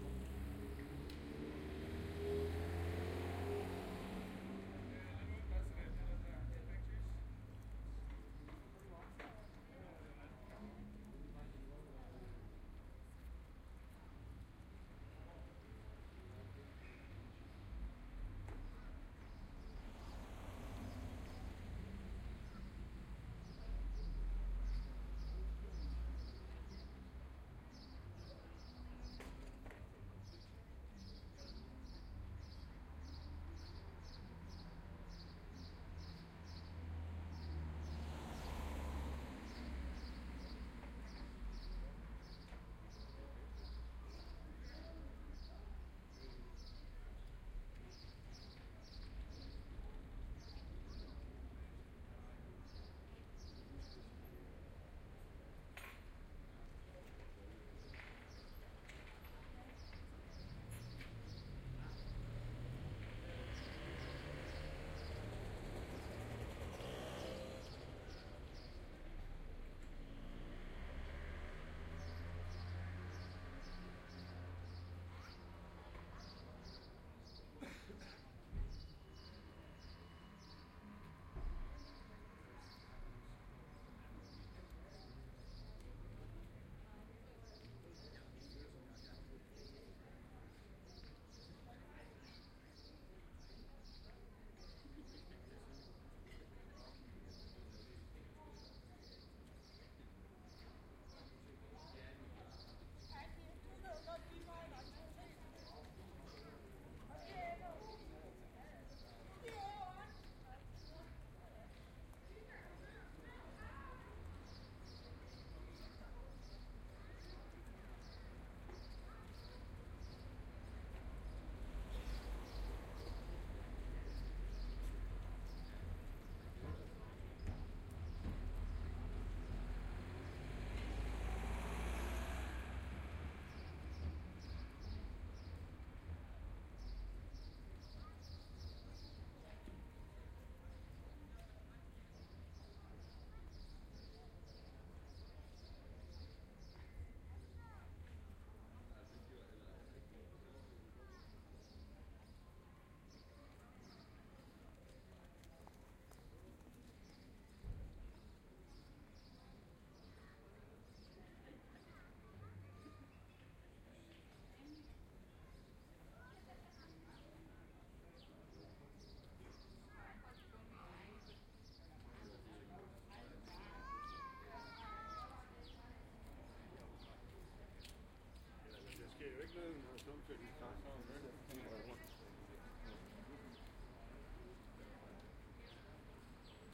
Ribe towncenter
Ribe is the oldest town of Denmark, situated in southwest Jutland and while my friends were having their icecreams I did this bit of recording near Ribe cathedral. Soundman OKM II, A 3 Adapter and iriver ihp-120.
danske, ribe, traffic, field-recording, people, binaural, denmark, town